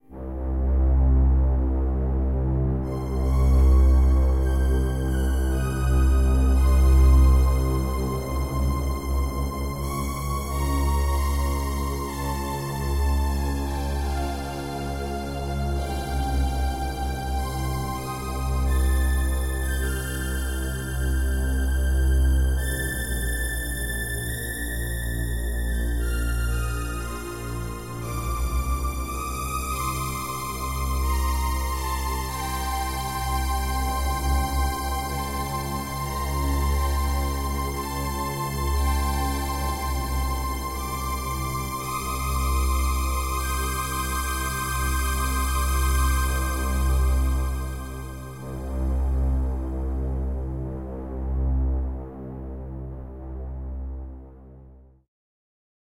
Suspense strings
A suspense style cinematic tune played on keyboard with strings sound.
background, cinematic, dark, drama, film, music, score, sinister, strings, suspense, synth, tense